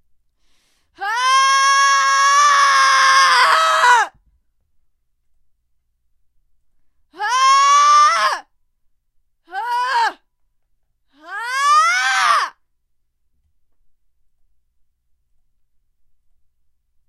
Power up yells
angry, battle, female, fight, grunt, lower, power, scream, up, yell